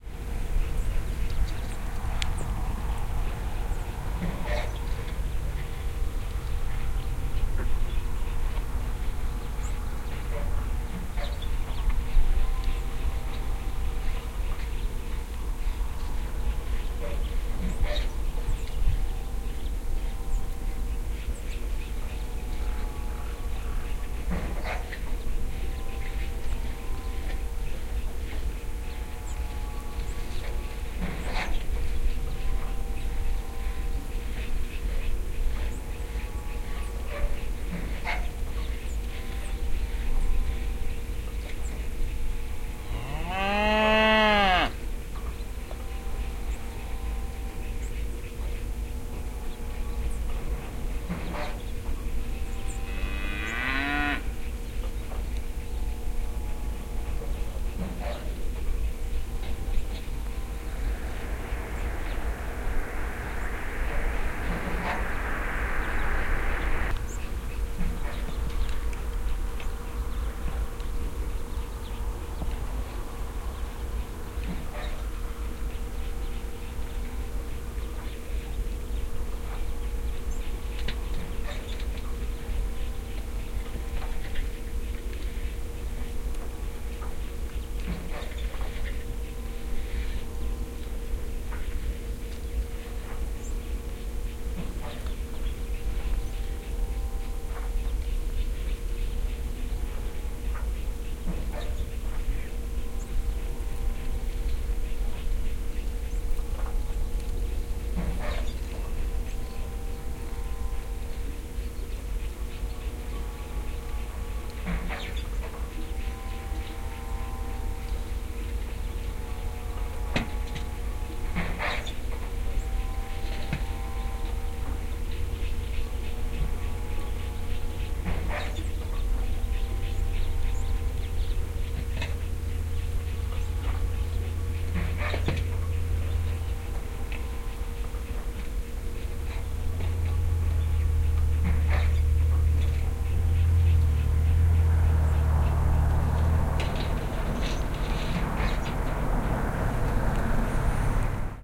Ambi - Oil welding in field cows birds, more distance - binaural stereo recording DPA4060 NAGRA SD - 2012 01 19 California N-E of Bakersfield
California, binaural, SD, cows, NAGRA, ambi, stereo, ambiance, DPA, welding, 4060, field, birds